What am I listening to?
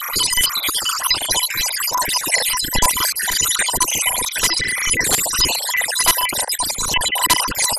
Computer system beeps
electromechanics, electronics, fantasy, film, machine, mechanical, noise, robotics, sfx, weird